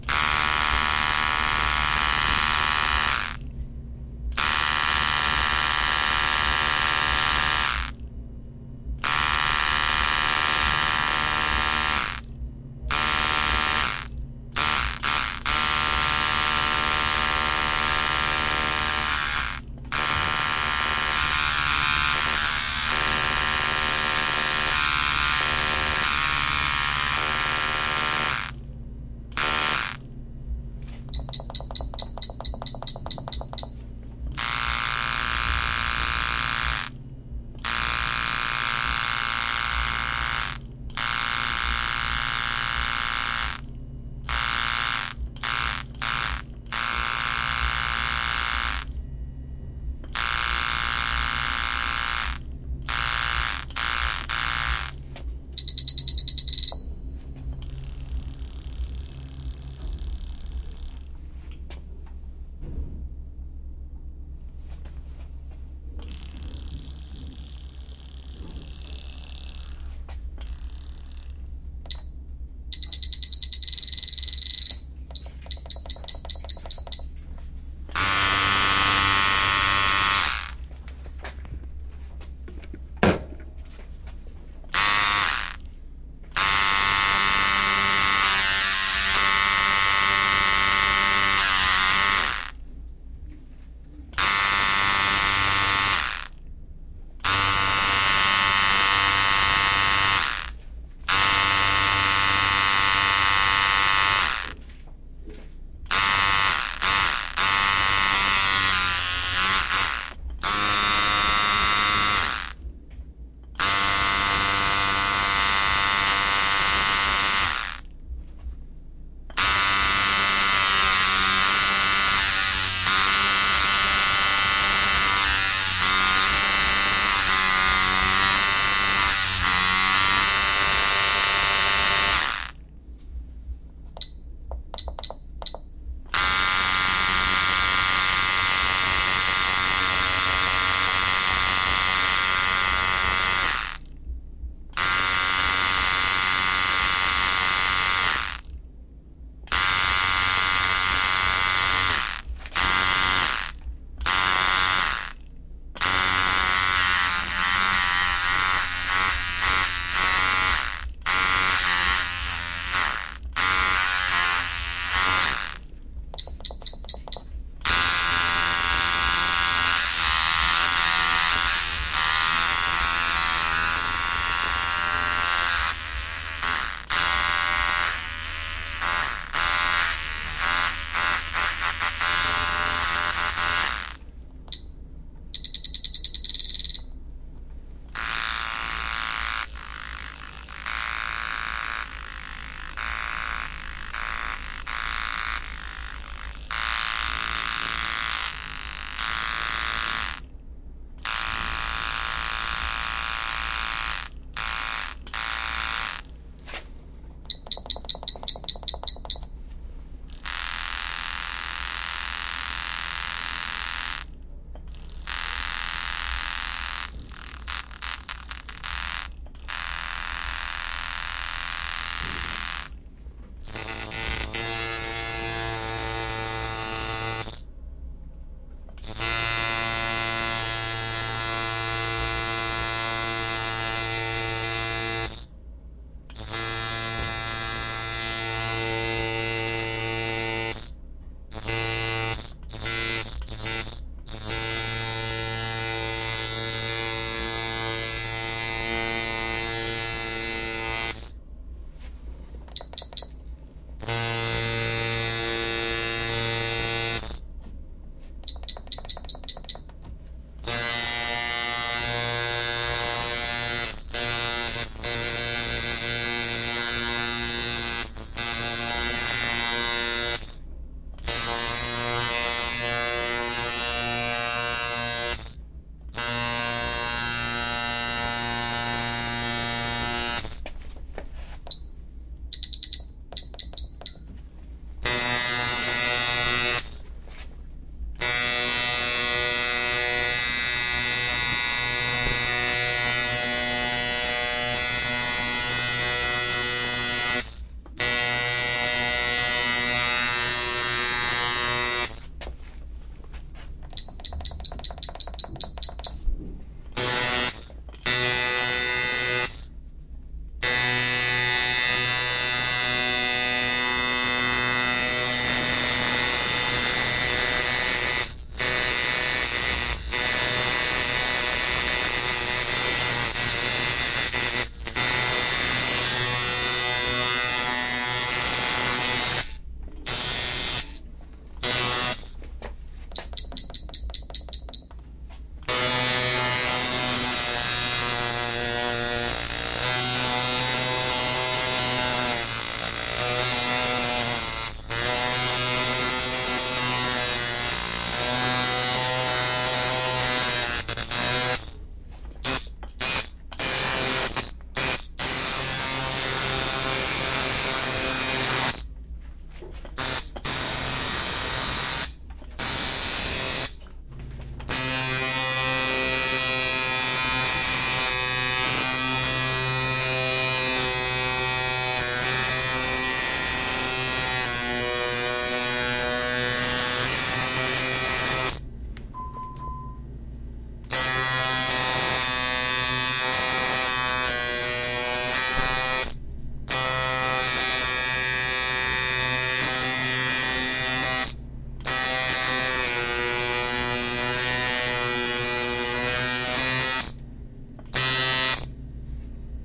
Long form recording session of various tattoo machines at different settings and voltages. Recorded using a Zoom H4nPro and a pair of Usi Pros at Authentic Tattoo Company in Raleigh, NC.